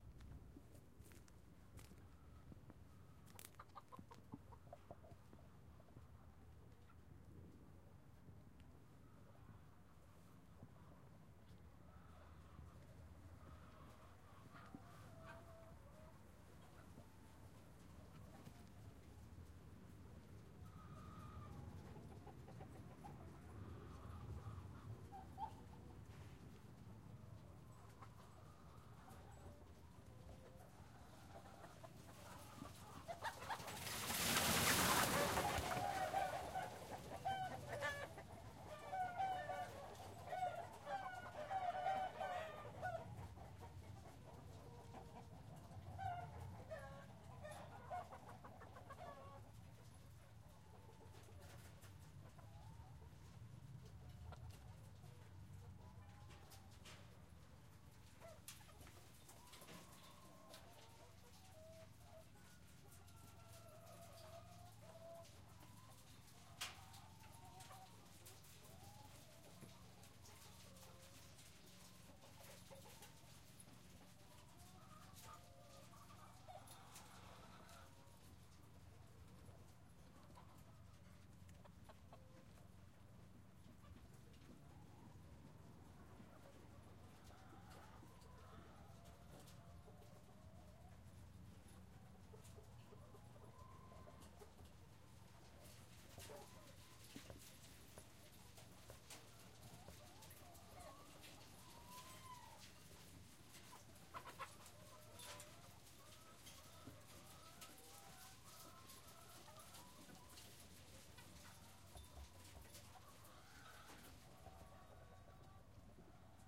chicken run
A farm of free running chicken. First quest, then suddenly scarred. They get quiet again. Scratching sound from inside the barn.